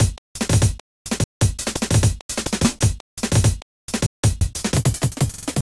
break beats loops 170